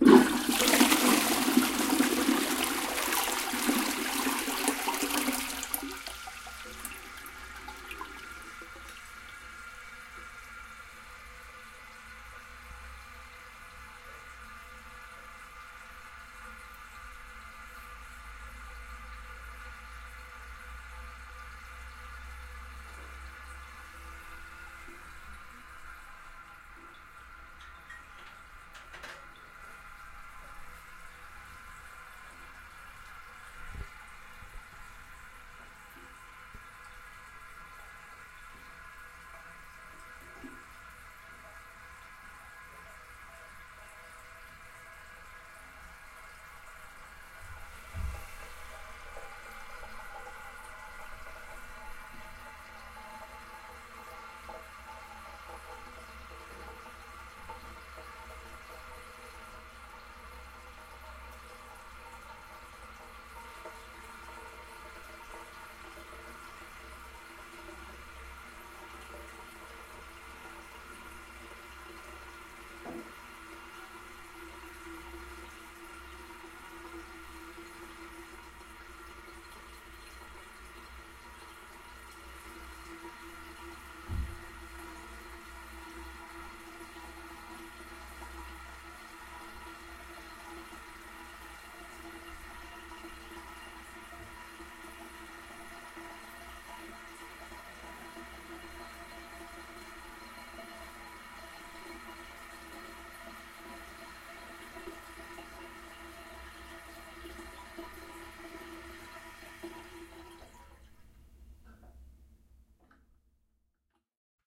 toilet flush 01
Water flush in a toilet bowl; then water fills a flushing box.